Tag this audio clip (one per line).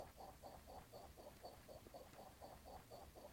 soplando
girando
elice